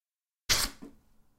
#4 Cardboard Scratch
Cutting up the cardboard.
Cardboard, Cut, Fast, Knife, Scratch, Sharp, Swish, Swoosh